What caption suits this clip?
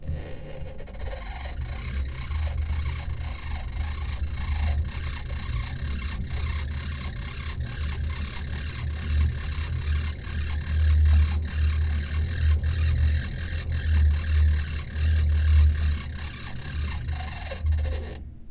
A recording of a broken elliptical exercise machine. Recorded with a Zoom H4 on 27 May 2013 in Neskowin, OR, USA.
accelerate, decelerate, elliptical, engine, exercise, hum, machine, motion, motor, squeak, whir